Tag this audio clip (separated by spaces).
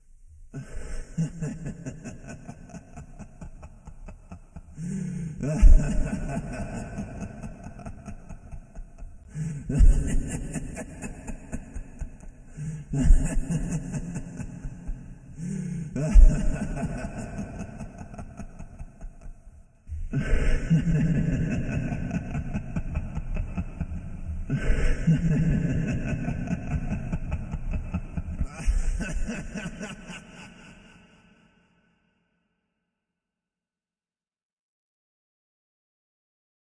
psychotic crazy